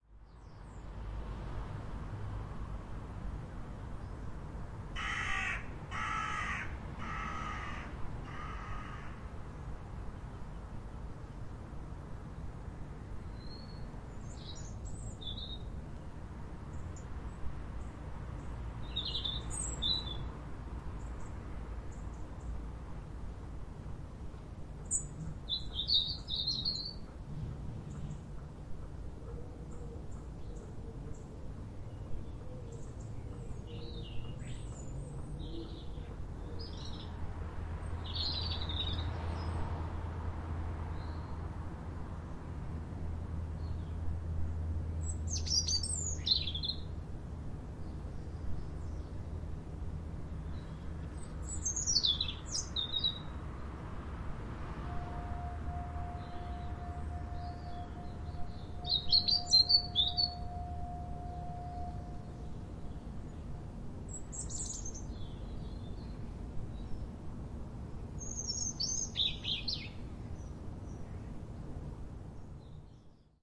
Early morning summer ambience
Recorded just outside of Southampton, UK. Some nice clear birdsong with the faint sounds of a city in the distance, including very distant foghorn.
Recorded on a Zoom H1, internal mics.